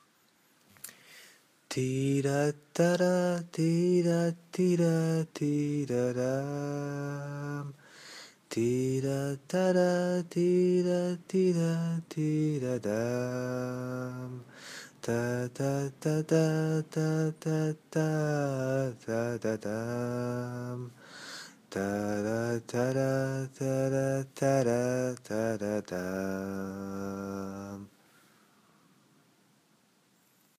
Song 1 from another dream
Recorded on November 26, 2014. This is another recording of my voice that I made right after I woke up from a dream. It is kind of a meloday of a song - I'm not sure if I heard it before or if it's original.
melody, music, chorus, music-box, dream, song